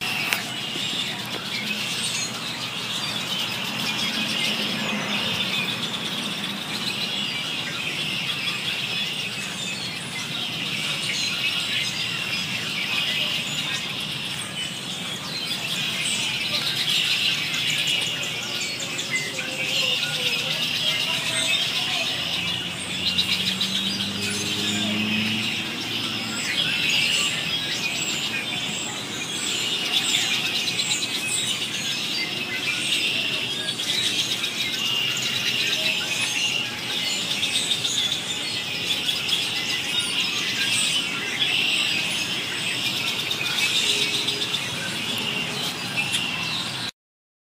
birds going absolutely crazy pt. 1
bird, birds, birdsong, field-recording, forest, nature, spring